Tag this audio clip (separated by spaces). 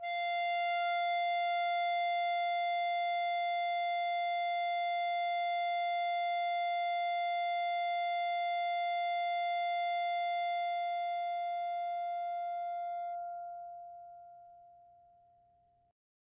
ambient drone ebow-guitar f4 melodic multisample